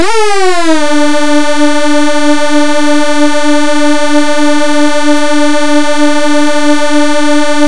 fake hoover 3
Fake hoover with detuned waves
detuned, 8-bits